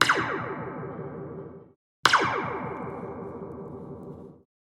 Ben Burtt style retro laser gun blast made from hitting a long fence wire. This recording is unprocessed so you can shape it how you want.
gun, retro, sci-fi, sound-effect